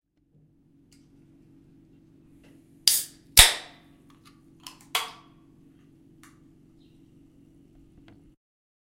Cola opening, recording with ZOOM, no fx.
opening, pepsicola